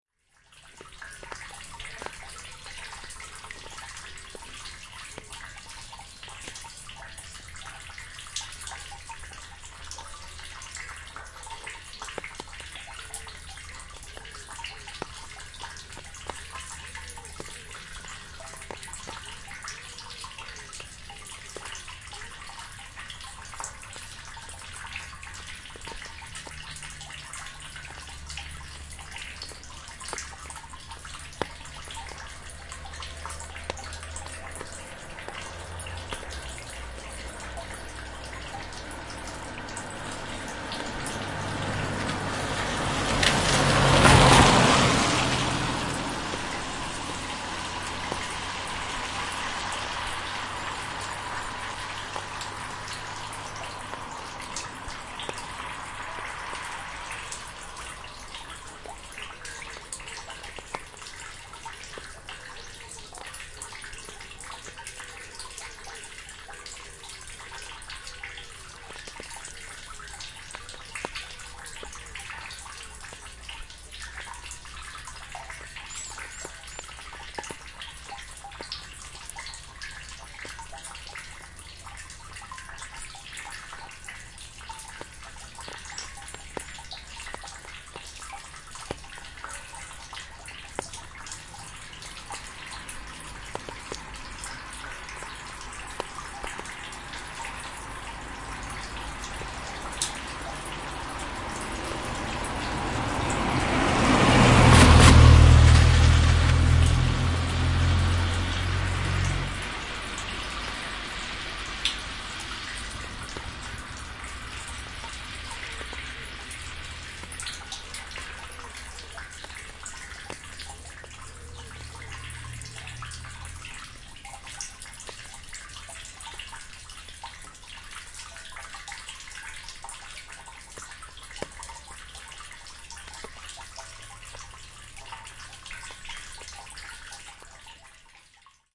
110811-drain in oure
11.08.2011: twelfth day of ethnographic research about truck drivers culture. Oure in Denmark. Drain on the street. rain water flowing down the drain. Drip-drop on my umbrella. Passing by cars.
cars, denmark, drain, drip-drop, drizzle, drizzling, field-recording, oure, rain, raining, spitting, street, water